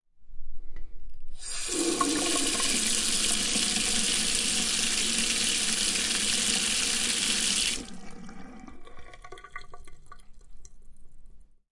bathroom sink water on off drain D100 XY
running, water